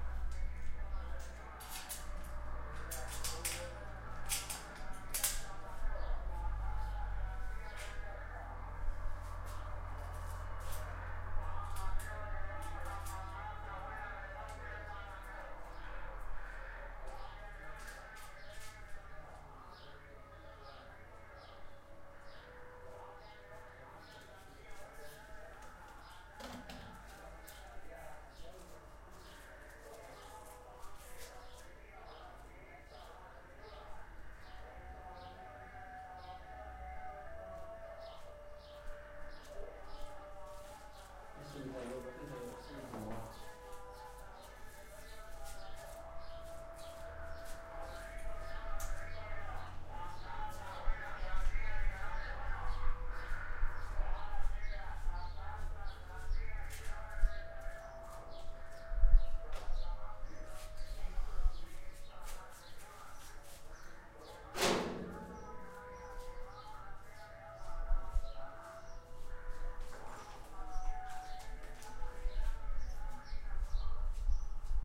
H4 - Vendedor ambulante y fondo-03
Street-vendor Vendedor-ambulante Mercadillo Street-market